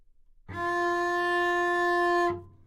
Double Bass - F4
Part of the Good-sounds dataset of monophonic instrumental sounds.
instrument::double bass
note::F
octave::4
midi note::65
good-sounds-id::8630